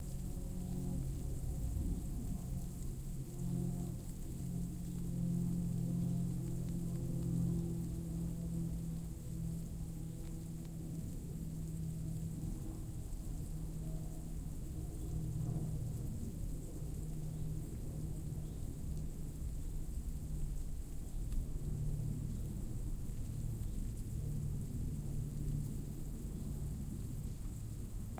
-Einen Ameisenhauffen von ganz nah
-Qualität "gut"
Natur, Insekten, field-recording